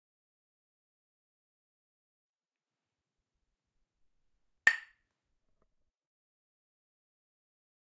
Tapping with two glasses of beer.